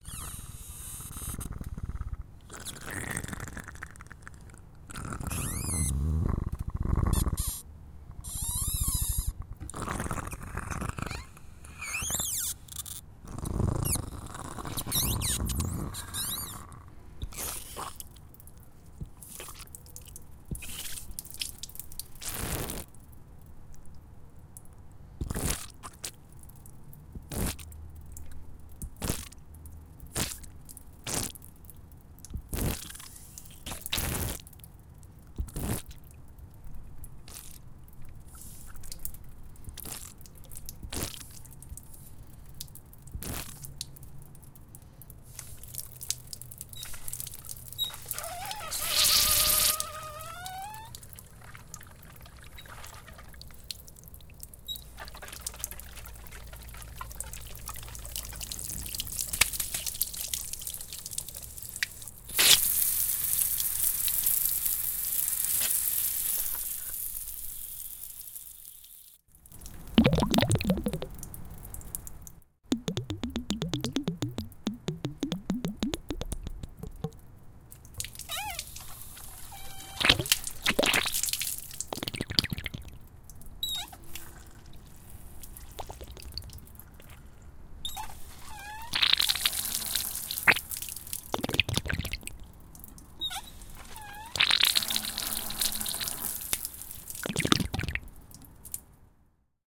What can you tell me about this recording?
Water hose and faucet
Miked at 6-12" distance.
Sounds of water gurgling inside wrapped outdoor garden hose as was being unrolled from its winch [mic aimed at nozzle and at leakage point along hose (separately)].
squeal, metallic, garden, Hose